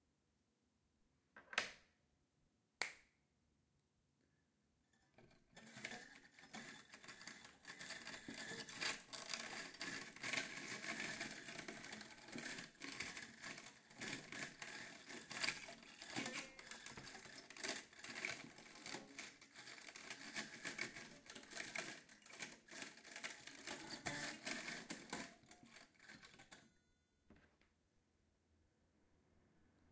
hommel one
This is a raw recording of a steelbrush being rubbed over the strings of a 17th Century Hommel Replica.
hommel, improvisation